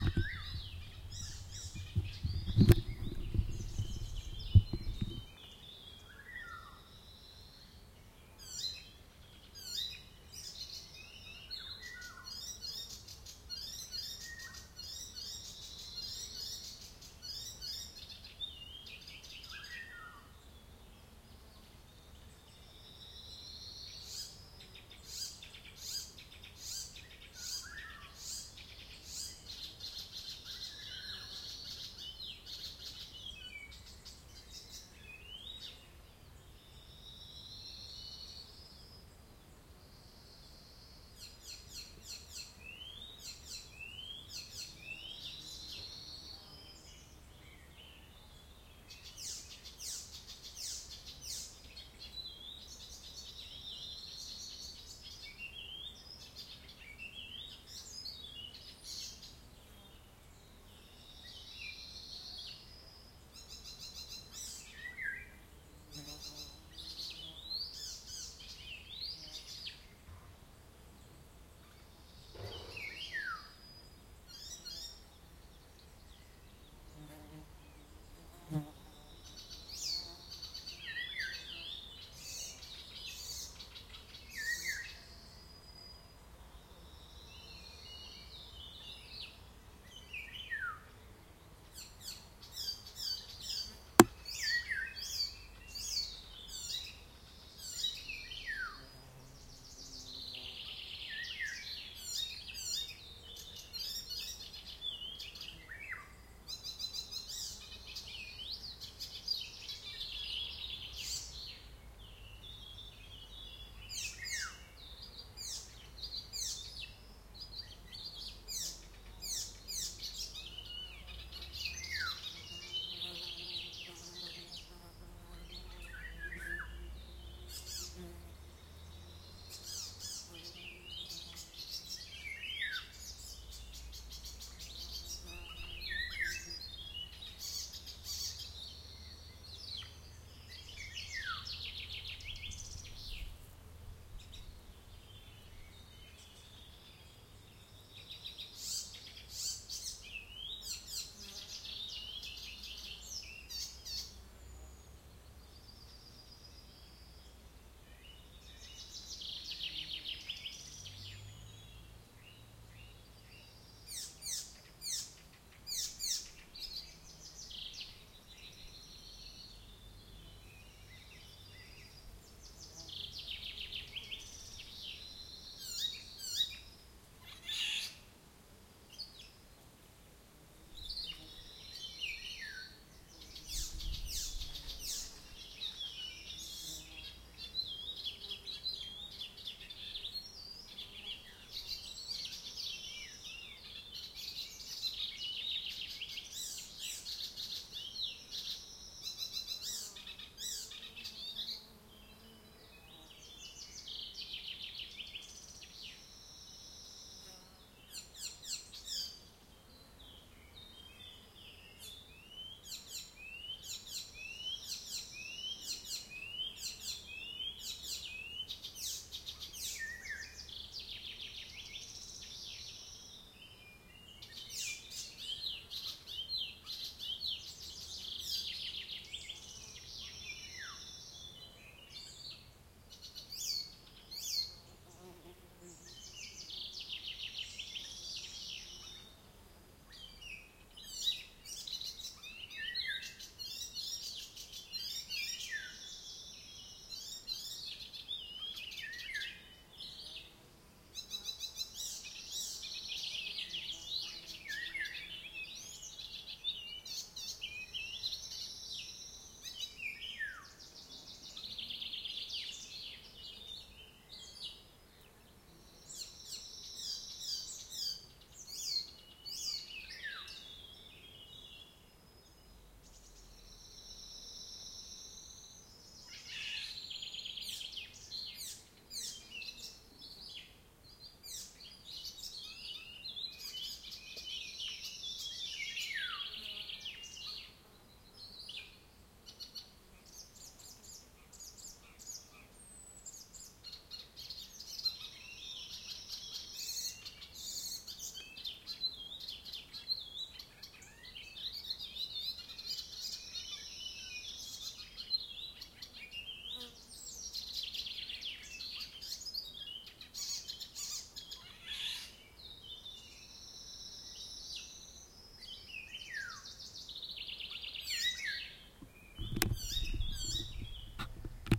amazing birds singing in Polish forest front
Polish, amazing, bird, birds, birdsong, field-recording, forest, front, nature, singing